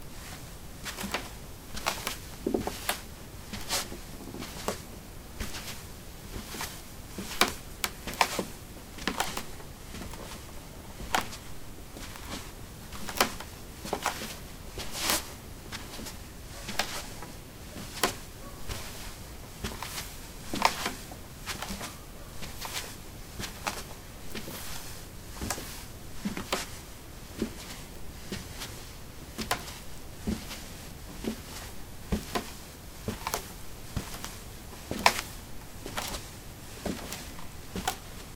wood 03a slippers walk
Walking on a wooden floor: slippers. Recorded with a ZOOM H2 in a basement of a house: a large wooden table placed on a carpet over concrete. Normalized with Audacity.